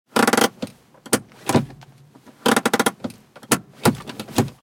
MITSUBISHI IMIEV electric car HANDBRAKE
electric car HANDBRAKE